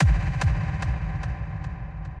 De-tuned single kick sound in FLStudio applied effects delay and chorus